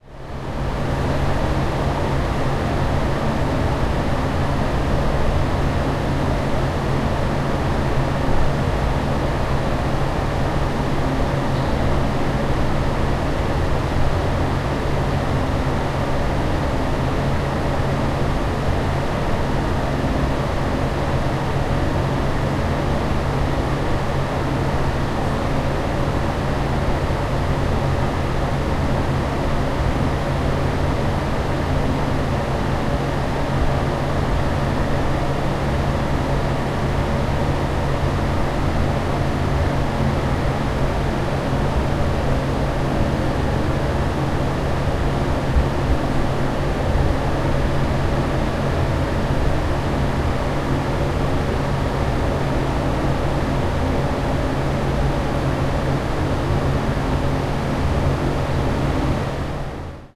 Air Extractor Fan, Public Toilets, A
Raw audio of a loud toilet extractor fan found in a toilet on the University of Surrey campus. The recorder was held about 2 meters away from the fan.
An example of how you might credit is by putting this in the description/credits:
The sound was recorded using a "H1 Zoom recorder" on 28th September 2017.
conditioner, extractor, fan, air, vent, toilet, ventilator